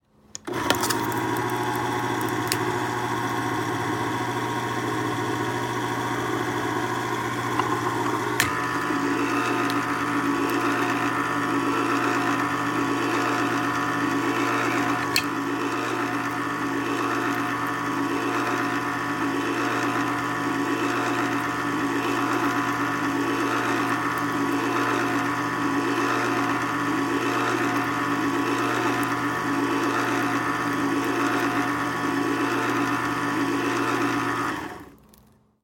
Mechanical - CanOpenerRunning
Mechanical electric can-opener running; rhythmic clicks open; metal on metal; machine whirring; start and stop. Recorded in a household kitchen.